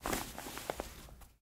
making movement sounds with my bag
crumple, cloth